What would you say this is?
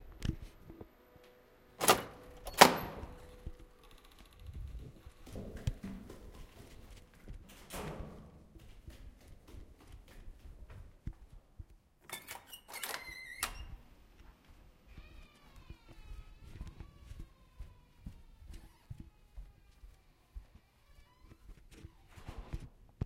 door fireproof stairwell squeaky faint walking stairs
In the stairwell at an independent senior living facility: opened heavy secure fire door (opens with bar, not knob), ran downstairs (echoes), hear door closing behind, open another door, walk on carpet, hear 2nd door close from behind. Walking sounds are faint.